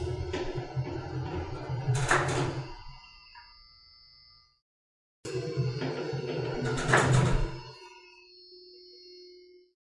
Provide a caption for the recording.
Elevator Sounds - Elevator Stopping
Sound of elevator stopping
Stop, Elevator, Whirring, clicking, Stopping